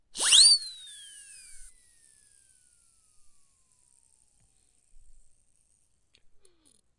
Siren Whistle 01
Siren Whistle - effect used a lot in classic animation. Recorded with Zoom H4
whistle, silly, soundeffect